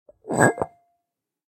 20170101 Big Wine Bottle on Ceramic Floor 12
Big wine bottle on ceramic floor, recorded with Rode iXY.